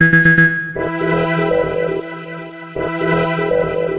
programmed and made with various softsynth
synth, loop, electronica